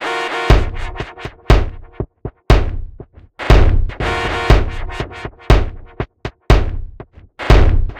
Massive Loop -38
An experimental loop with a slight melodic touch created with Massive within Reaktor from Native Instruments. Mastered with several plugins within Wavelab.
120bpm, drumloop, experimental, loop, minimal